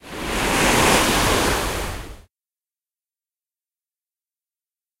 sample of a wave crashing a side of a ship or rocks
boat, sea, ship, water, wave